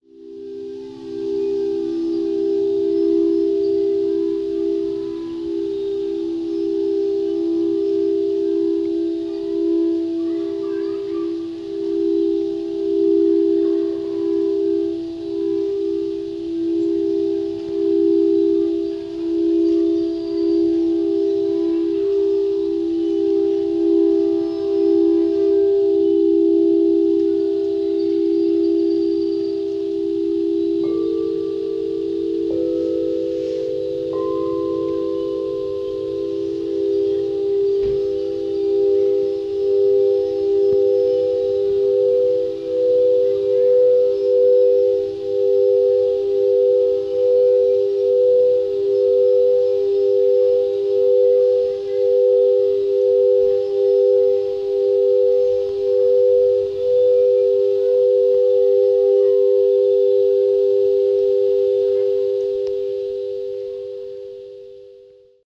Serene Crystal Singing Bowls
Stereo recording of a crystal bowl session I had given in a park with a few birds chiming in here and there.